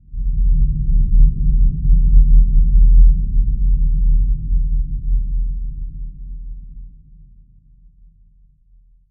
A very low frequency rumble generated using Audacity; could be used to make the sound of a distant earthquake but bear in mind you need very large speakers to render this effect.
low-frequency, rumble, earthquake, quake